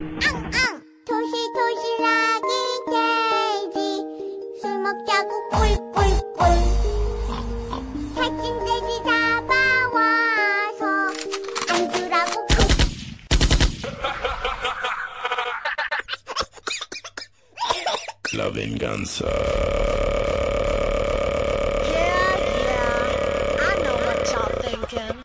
la venganza
voice the vengance
cool, beat, mega, robot, voice